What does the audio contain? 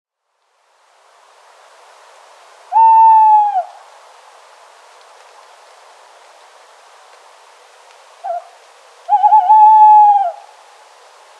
A recording of a tawny owl at night in Cheshire in the UK. Recorded on an sm57.
hoot, hooting, mono, night, owl, owls, tawny-owl